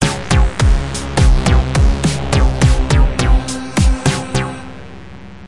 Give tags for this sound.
shock; shocked; suspense

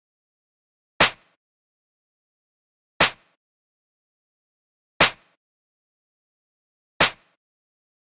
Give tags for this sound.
120bpm; beat; drums; hip; hop; loop; quantized; rhythm; rhythmic; snare

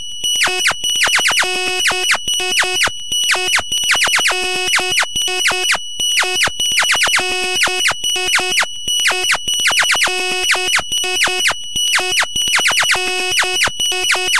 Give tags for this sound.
random; alien; digital; impulse; tone; sound-design; sci-fi; basic-waveform; experimental; minimal; electronic; rhythm; laser; glitch; annoying

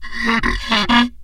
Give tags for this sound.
wood; idiophone; daxophone; friction; instrument

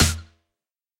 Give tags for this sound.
drum; experimental; hits; idm; kit; noise; samples; sounds; techno

